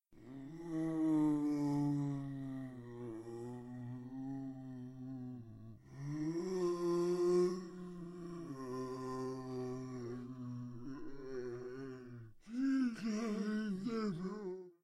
Idle 4 - The Ridge - Host
Part of a screaming mutant I made for a student-game from 2017 called The Ridge.
Inspired by the normal zombies in Left 4 Dead.
Recorded with Audacity, my voice, friends and too much free-time.
attack, crazy, fear, horror, left4dead, monster, scary, sci-fi, screaming, terror, thrill, zombie